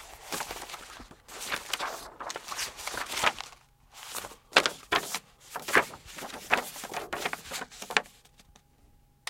flipping through pages 1
a recording of flipping through pages of a book and a leaflet.
book, library, pages